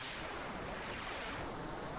Amplification at -28,1 to diminish sound.The sound has an effect which turn; a rounded up effect.Retro flanger has an average stall (8,02 ms) and flange frequency (1,58 Hz)
crack, amplification